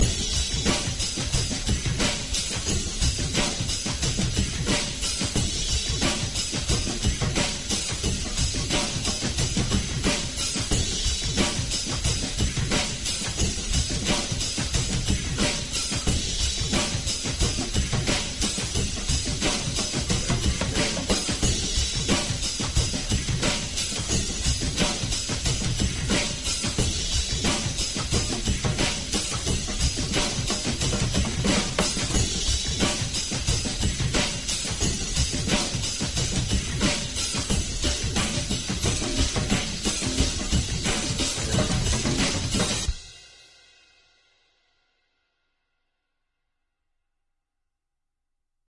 Heavy Retro Beat
Another one of my heavy beat experiments I made with the Amen break as the foundation. Mixed with some live drumming done by myself
Amen, beat, Break, drums, heavy, layered, Live, old, retro, samples